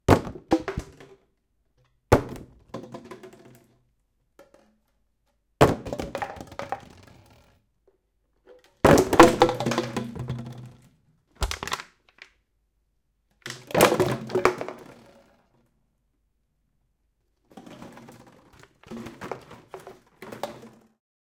Empty Plastic Bottle Falling
Dropping some empty water bottles on the floor.
Result of this recording session:
Recorded with Zoom H2. Edited with Audacity.
bottle, container, drop, dropping, junk, PET-bottle, plastic, recycling, trash